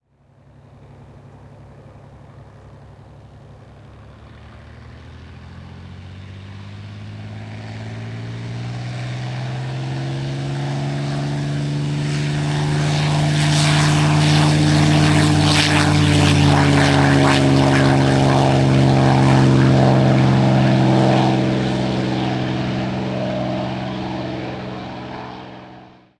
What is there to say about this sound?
P-51 Mustang Takeoff
The wonderful music of a P-51B Mustang taking off powered by an amazing Packard Merlin V12 engine.
Merlin, V12, Veteran